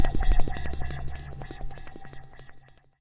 KILAVUZ Berivan 2014 2015 under water sound
This second sound is a recorded sound of a bike chain, I modified it using some effects :
1-Normalize
2-Wahwah effect with
-frequency = 3.3 Hz
-start phase = 0
-depth = 70%
-resonance = 2.5
-wah frequency shift = 30%
3-Melt closing
The final sound gives an impression of a sound under water.